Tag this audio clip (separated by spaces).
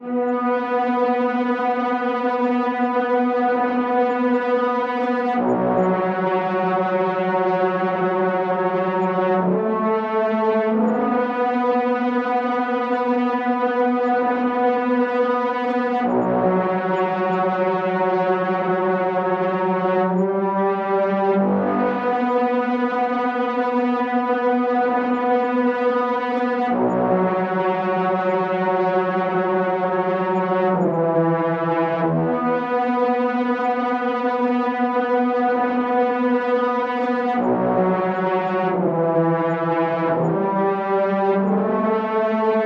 celli distorted loop melody orchestra